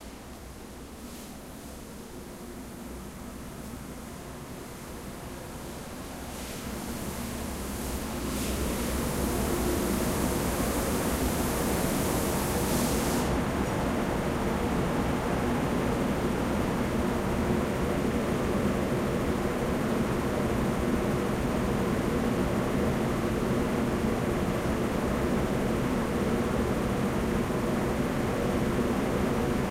Warehouse Powder Coat Facility
Inside an industrial warehouse